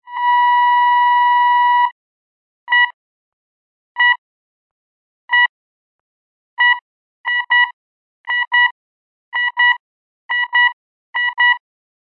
Despertador sintetico revivir
Despertador
Sound of Alarm clock